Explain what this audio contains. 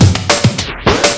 Experimenting with beats in analog x's scratch instead of vocal and instrument samples this time. Loop made to sound swooshy.